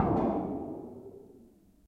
Big sheet tap 2
All the sounds in this pack are the results of me playing with a big 8'x4' sheet of galvanised tin. I brushed, stroked, tapped hit, wobbled and moved the sheet about. These are some of the sounds I managed to create
experimental, hard, metal, metalic, percussive, resonant, unprocessed